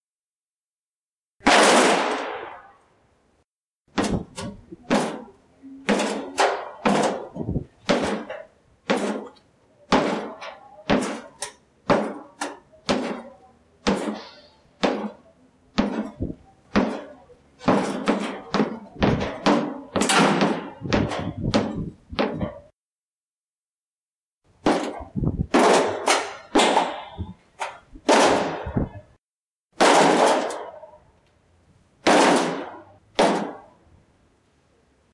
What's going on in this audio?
Contacto Metal
Choque con metal